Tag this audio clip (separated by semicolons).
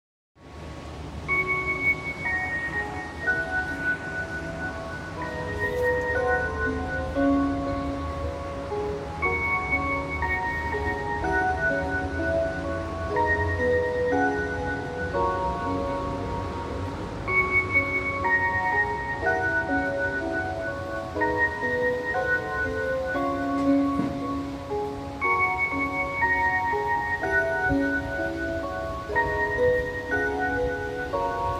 background-music; blind; calm; hong-kong; machine; music; peaceful